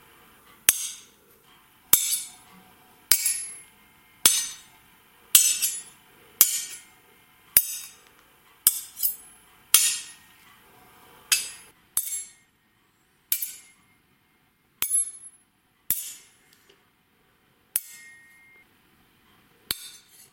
Sword hits - no reverb
Made with a table knife and a dagger